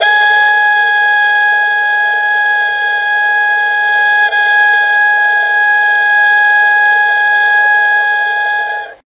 The erhu is an chinese string instrument with two strings,which is played
a lot in China. The samples are recordings from a free VST-instrument.
Bowed, Chinese, Erhu, Violin